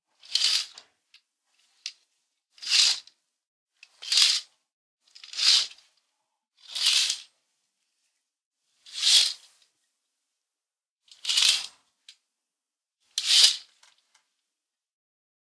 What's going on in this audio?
Quickly opening and closing curtains.
opening, close, curtains, open, quick, closing